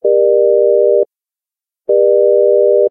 Minor Chord Intonation
A minor chord in equal tempered intonation followed by a minor chord in just intonation. Sorry for no arpeggios on this one.
This series should be better tuned than the other sound titled "Minor Chord". In this chord, the just intonation uses a 6/5 and 3/2 ratio for the 3rd and 5th (respectively) from the root of the chord. The root of the chord was 400 hz, hence:
400hz,480hz,600hz
Whereas the other file used a 19/16 offset from the root, hence:
400hz,475hz,600hz
and does not sound as in tune.